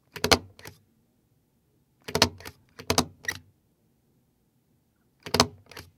es-staplers
staple, office, stapler, work, supplies